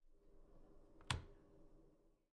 Light Switch click on
Light switch clicking on. Recorded with an H4N recorder in my home.
bedroom, house, light, switch